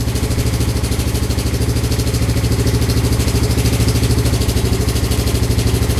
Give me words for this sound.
ATV Engine Idle 2
Industrial, machine, high, medium, Rev, Buzz, electric, Machinery, low, motor, engine, Factory, Mechanical